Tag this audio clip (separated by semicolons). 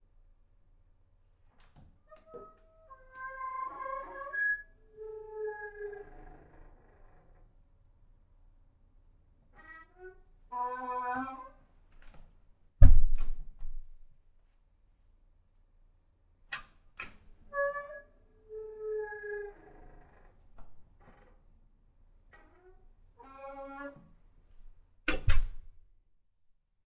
open; heavy; horror; gate; close; squeaky; door